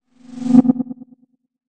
Just a simple sound for teleporting or magic, etc.